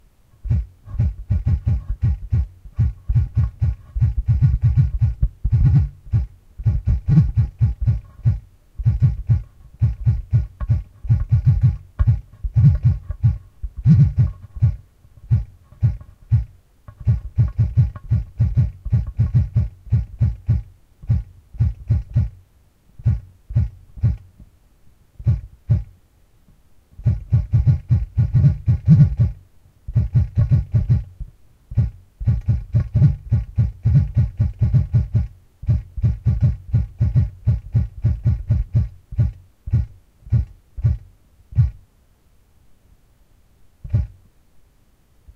Haptic Feedback
Cell phone vibrating on a hard surface, recorded from within.
I put a HTC Wildfire smartphone on top of a turned-over plastic IKEA bin and a Zoom H2 under it.
touchpad, communication, type, input, typing, nokia, phone, handy, cell, interaction, telephone, device, touchscreen, vibrator, smartphone, technology, mobile, touch-screen, vibration, touch-pad